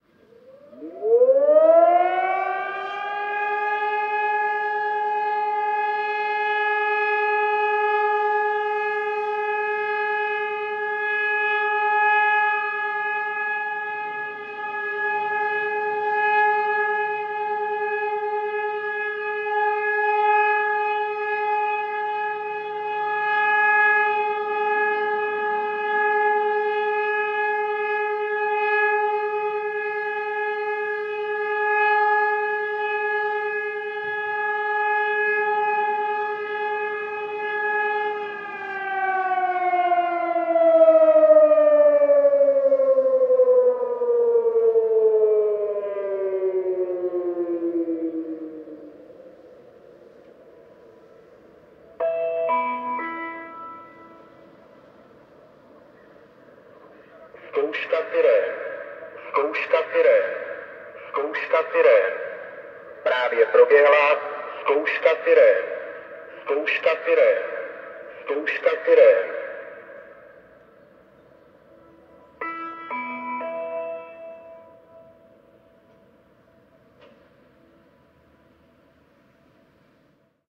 Air raid siren (Prague)
Shortened air raid siren test in Prague. Recorded with Zoom f8 + Sennheiser MKH416.